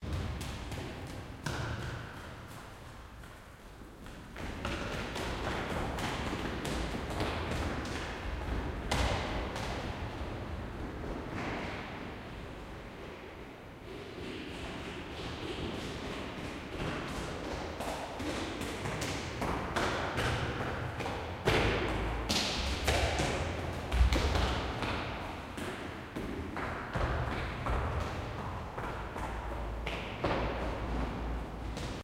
Two people running up and down a staircase and left and right through a hall in a large empty building.
feet, large, run, running, space, staircase, stairs